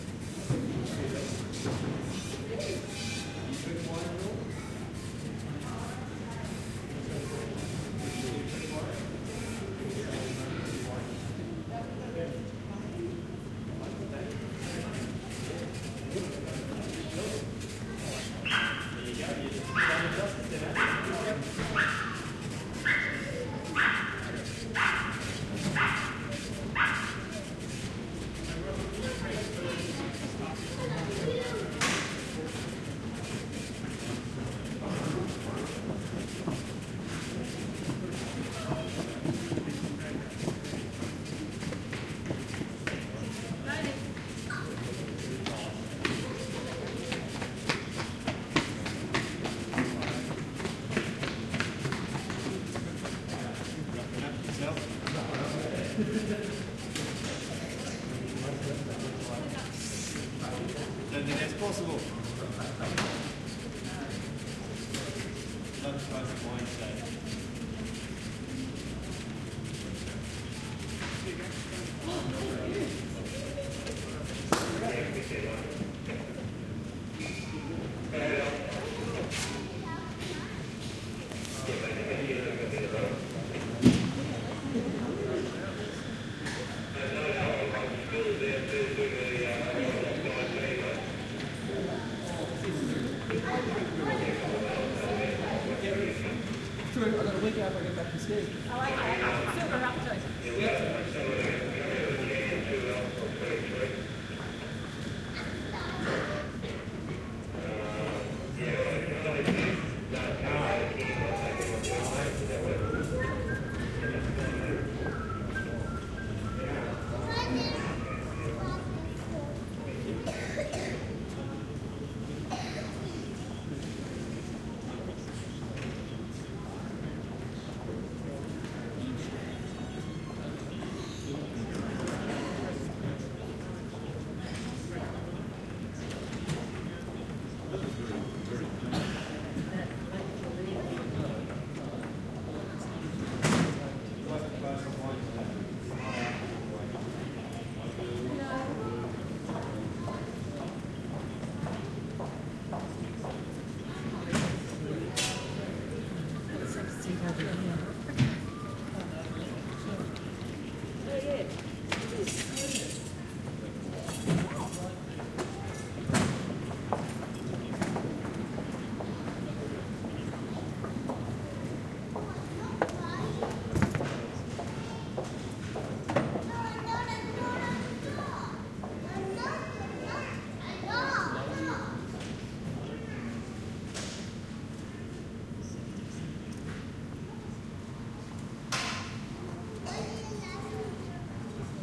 Airport Lounge Brisbane 2
Ambient sounds of terminal lounge. Recording chain: Panasonic WM61-A microphones - Edirol R09HR